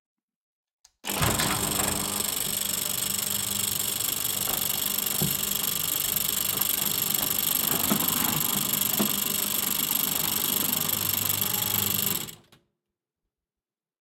Electronic Gate Open 03
start, motor, machine, engine, gate